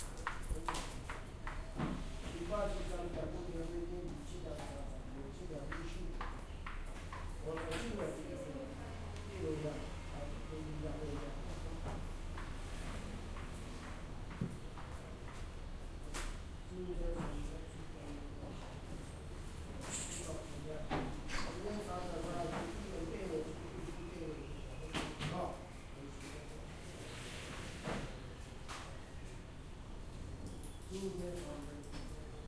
taipei office
office in taipei, the sound of a machine
machine, soundscape, office, taipei, machinery